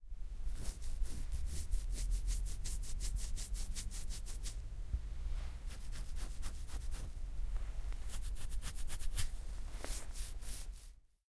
The sound of scratching on an unshaved beard.